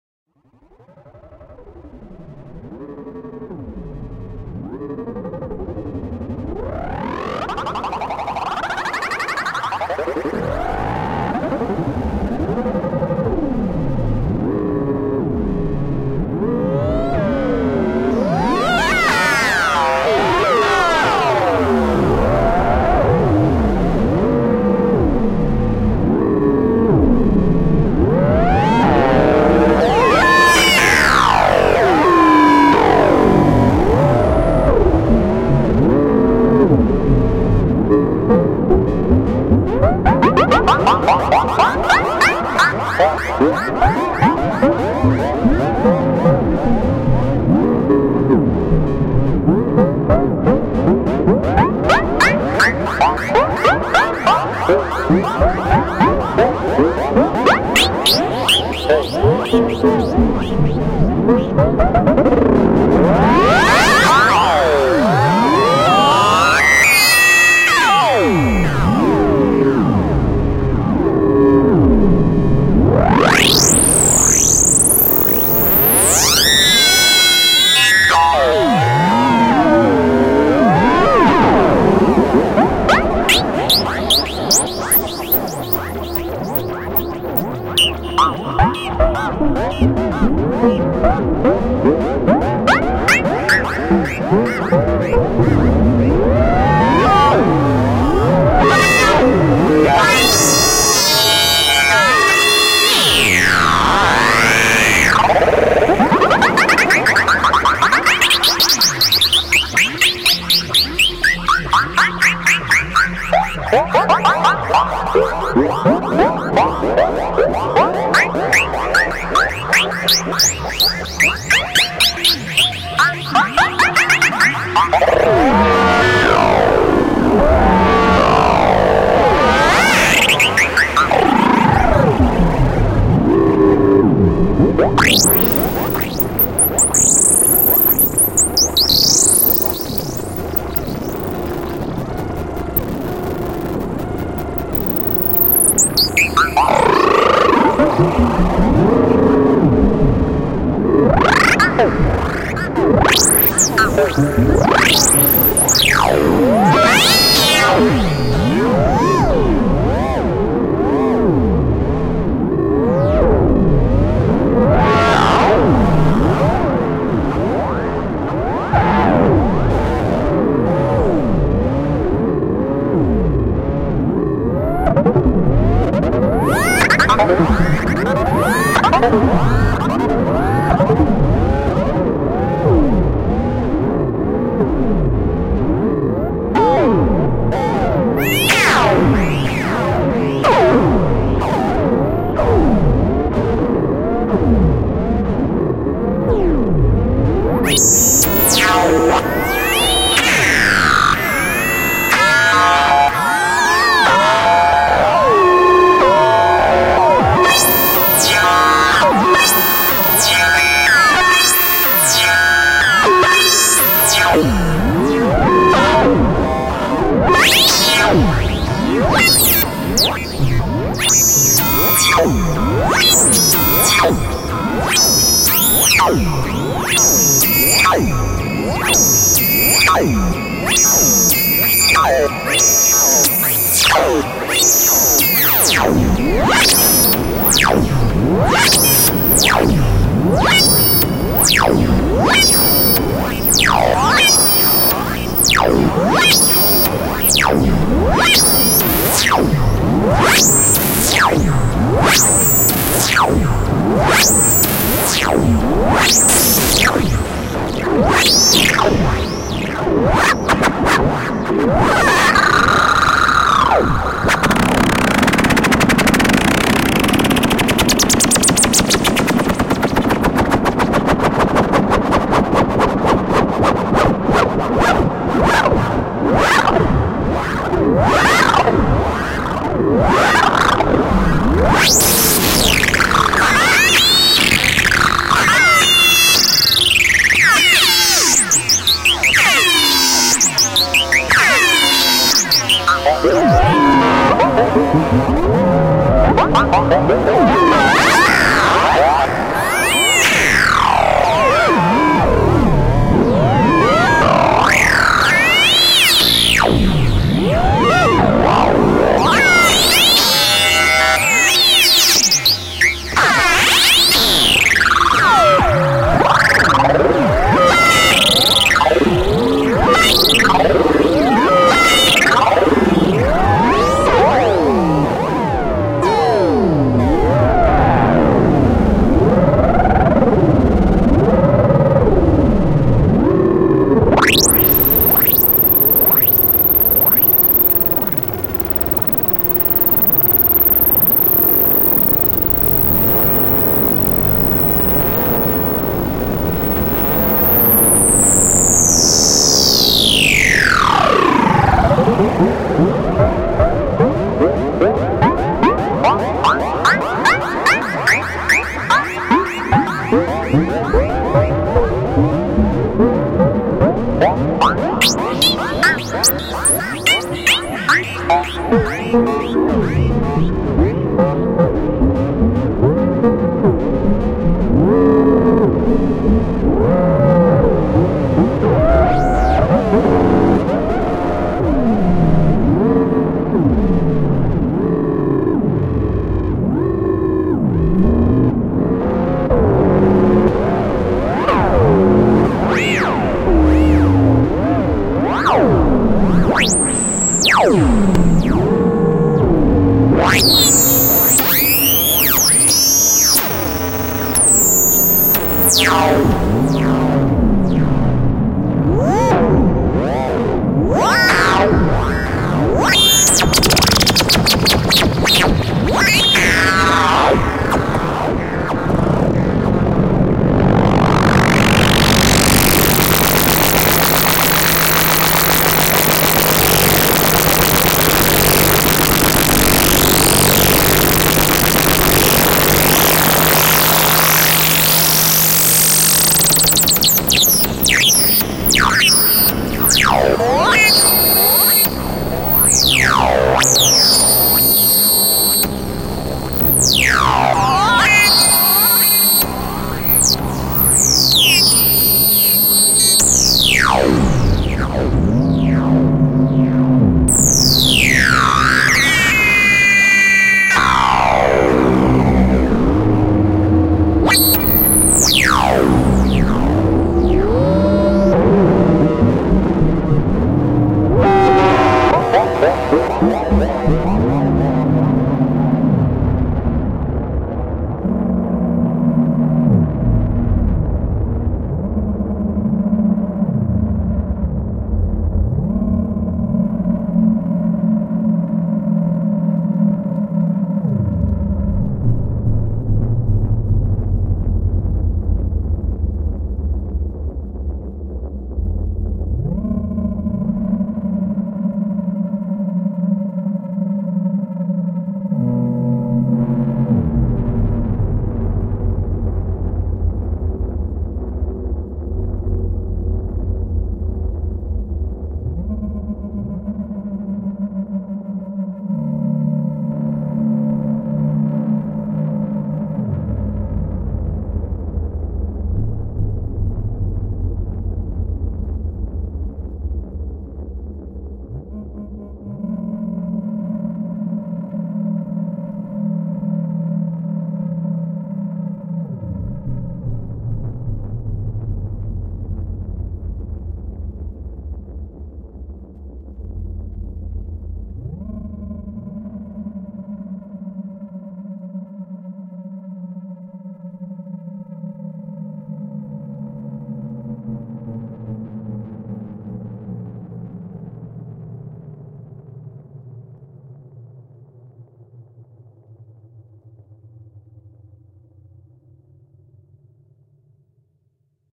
Screaming machine, 08.01.2014
Created with the Korg Monotribe groovebox, a Doepfer A-100 modular synthesizer and a TC Electronic Flashback delay.
Created with the Korg Monotribe groovebox, a Doepfer A-100 modular synthesizer and a TC Electronic Flashback delay.
Monotribe -> A-100 -> Flashback
Recorded on the 8th of January 2014 using Cubase 6.5
I believe I modulated the filter at audio-rate, perhaps I also bitcrushed the signal before that.
It's always nice to hear what projects you use these pieces for.
You can also check out my pond5 profile. Perhaps you find something you like there.
70s, agony, analogue, angry, computer, death, disturbing, failing, fear, horror, machine, musical-screams, pain, painful, radiophonic, retro, robot, Science-Fiction, Sci-Fi, scream, screaming, screams, shout, voice, vowel, weird, yell, yelling